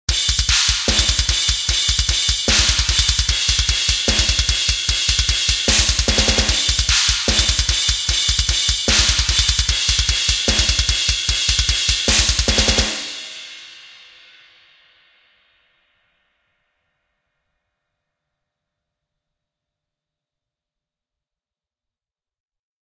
Death Metal Drums
Bass, Brutal, Core, Cymbal, Death, Drums, Heavy, Metal, Snare